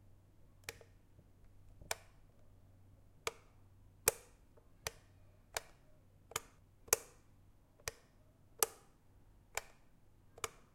switch button on off
switches, switch, click, off